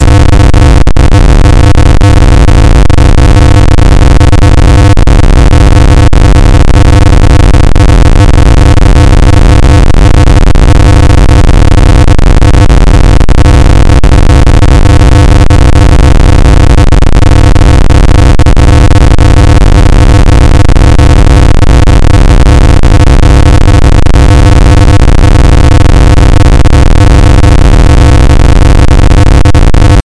This kind of noise randomly generates the values -1 or +1 at a given rate per second. This number is the frequency. In this example the frequency is 200 Hz. The algorithm for this noise was created two years ago by myself in C++, as an imitation of noise generators in SuperCollider 2.